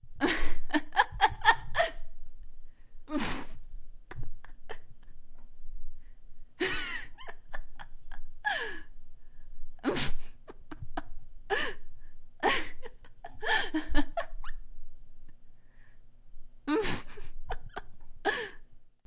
Female Genuine Laughter
Me giggling as I normally would. If you want, you can place a link into the comments of the work using the sound. Thank you.
girl, voice, woman, funny, laugh, mirth, giggling, laughter, giggles, female, real